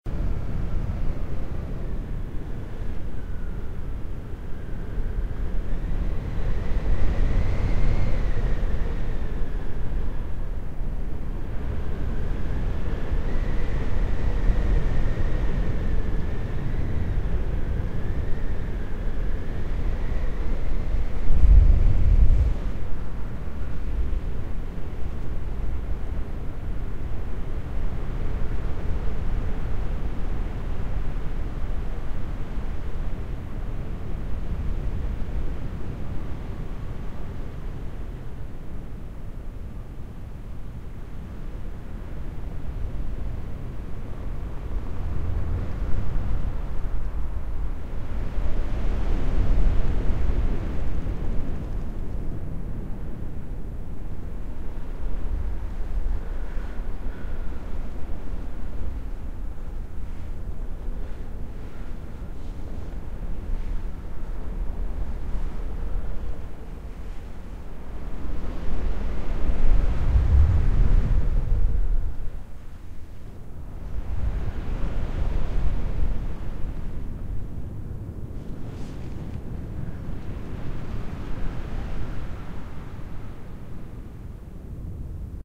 Cold howling arctic wind ambience for sleep and relaxation.